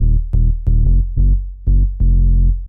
90 Subatomik Bassline 08
fresh rumblin basslines-good for lofi hiphop
atomic, bassline, electro, loop, series, sound